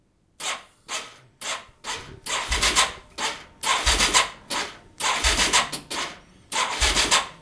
ATV Engine Turn 3
engine, electric, high, Machinery, Factory, medium, machine, Mechanical, motor, Industrial, low, Rev, Buzz